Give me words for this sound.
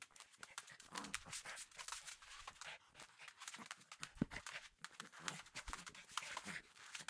Dog stepping
cz, czech, dog, panska